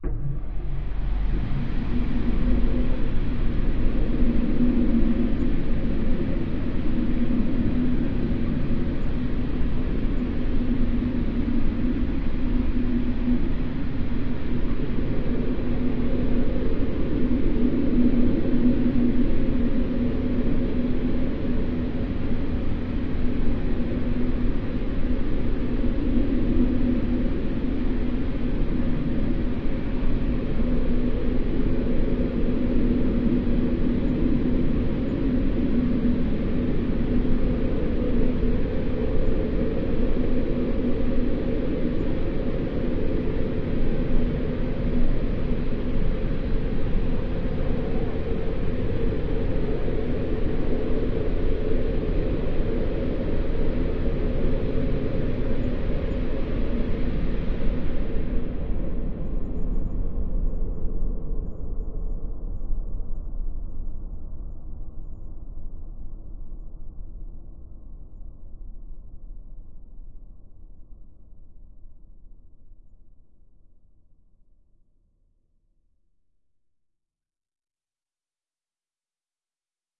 LAYERS 006 - Chrunched Church Organ Drone Pad - F#0
LAYERS 006 - Chrunched Church Organ Drone Pad is an extensive multisample package containing 97 samples covering C0 till C8. The key name is included in the sample name. The sound of Chrunched Church Organ Drone Pad is mainly already in the name: an ambient organ drone sound with some interesting movement and harmonies that can be played as a PAD sound in your favourite sampler. It was created using NI Kontakt 3 as well as some soft synths (Karma Synth) within Cubase and a lot of convolution (Voxengo's Pristine Space is my favourite) and other reverbs as well as NI Spectral Delay.